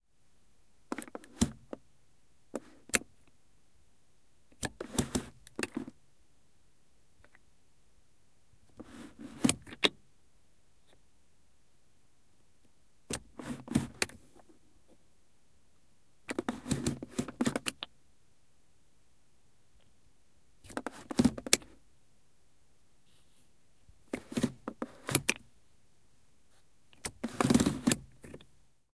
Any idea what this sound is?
CAR-GEARSTICK, Volkswagen Golf GLE 1.8 Automatic, changing gears with gearstick, different changes, engine off-0001
Part of Cars & other vehicles -pack, which includes sounds of common cars. Sounds of this pack are just recordings with no further processing. Recorded in 2014, mostly with H4n & Oktava MK012.
Volkswagen, car, stick-shift, vehicle, automatic, gearstick